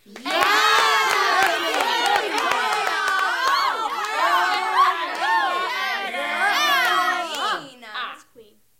Cheer 3 yas kween
Small audience cheering with a "yas kween" attitude.
studio, cheering, group, theater, audience, yaskween, theatre, cheer, crowd